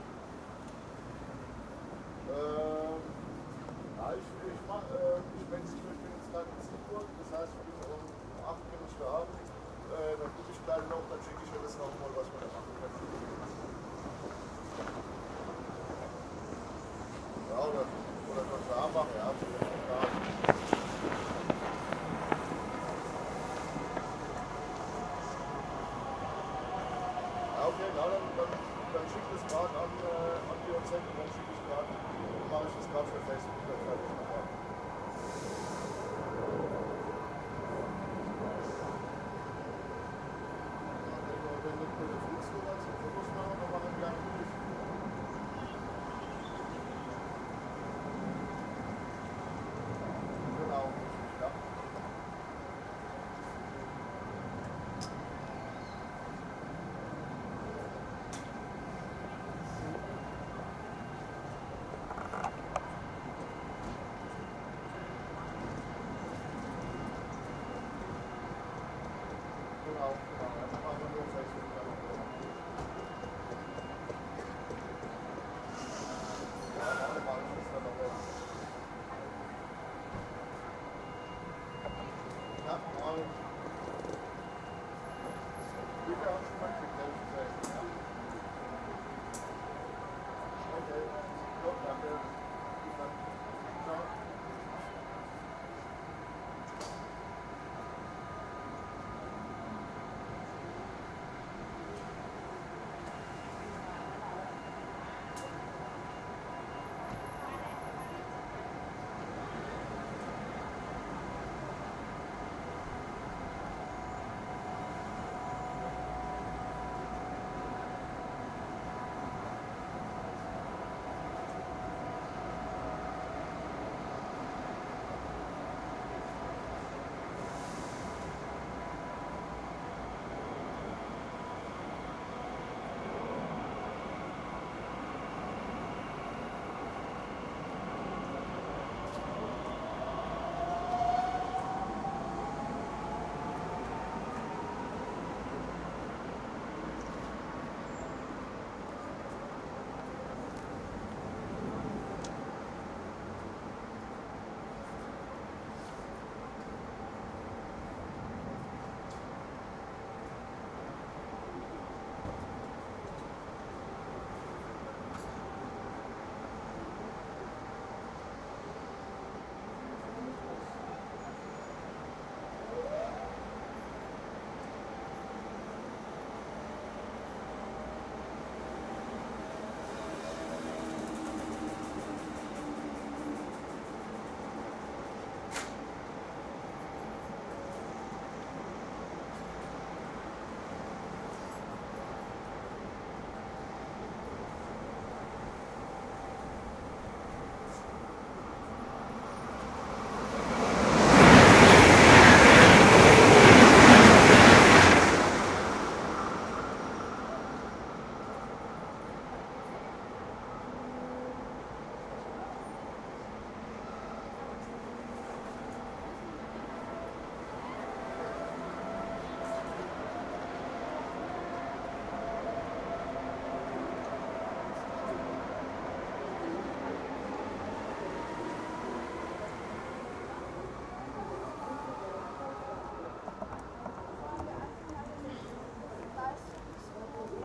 Sieg ICE +7db
Siegburg Bahnhof train station stereo recording. It´s a stereo track of the Deutsche Bahn ICE train passing trough Siegburg station. Has a little bit of human cellphone talking and soft traffic background. Hope works for you.
Train, Stereo, free, siegburg, Railway, ln, Field-Recording, k